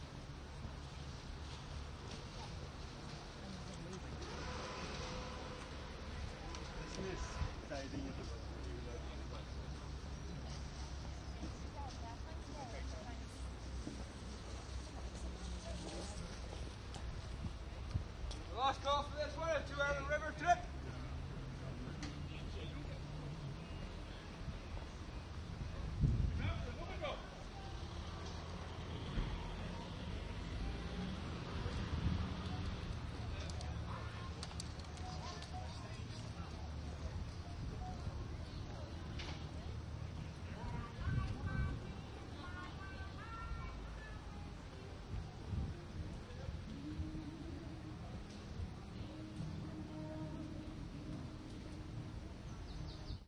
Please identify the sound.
riverbank-boats
Early afternoon, June, walking along a riverbank promenade. On the right a large pleasure-boat is coming in to dock, turning through 180 degrees - the intermittent high-pitched noise is the engine as the speed is adjusted. A guy pitching for passengers on another boat. A bar playing an old jazz song. Binaural on Zoom H1.
riverbank, binaural